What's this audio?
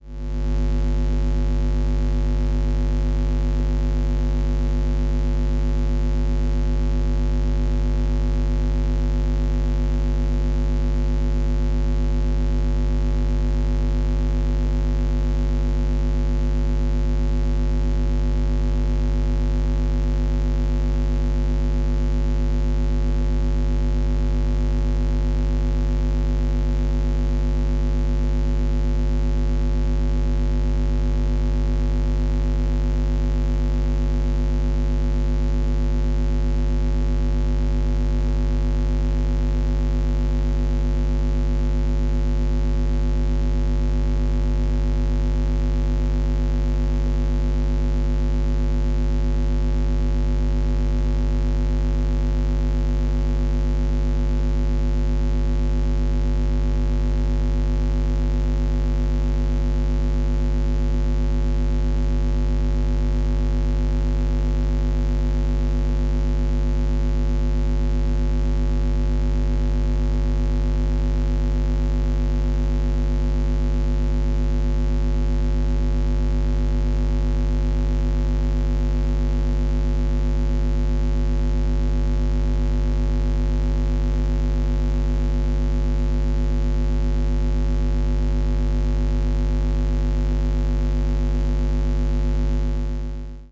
Tascam 246 (Buzz) 2
Induction coil pickup recording of a Tascam 246 four track cassette recorder. Recorded with a Zoom H5 portable recorder and a JrF Induction Coil Pick-up
electronic, experimental, cassette-tape, fx, 4-track, effect, four-track, tascam, soundscape, drone, field-recording, sci-fi, electromagnetic, noise, dark, cassette, abstract, tape-machine, induction-coil